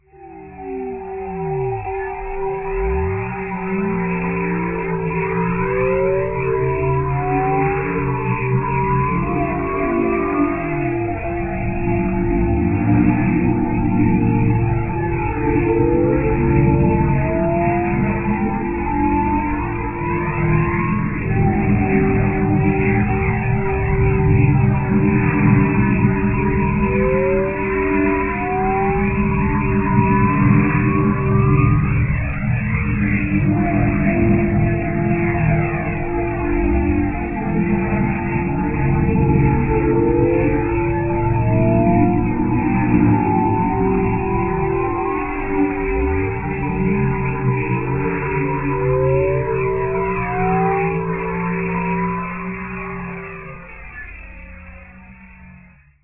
Stereo Ambiance Wave
Alien, Ambient, Audio, Background, Dub, Dubstep, Effect, Electronic, Funny, Noise, sci-fi, Sound, Spooky, Strange, Synth, Weird